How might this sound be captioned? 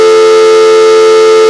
freak tone of a phone